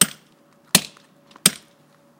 hammer 3pulse
Small sledge hammer striking a chisel cutting into hardened cement, 3 evenly spaced blows.